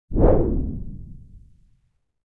Woosh Medium 01
White noise soundeffect from my Wooshes Pack. Useful for motion graphic animations.